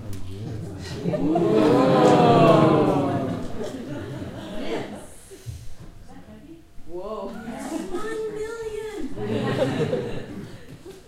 small group laugh 5
A group of about twenty people laughing during a presentation.Recorded from behind the audience using the Zoom H4 on-board microphones.
audience, dry, female, field-recording, funny, group, laugh, male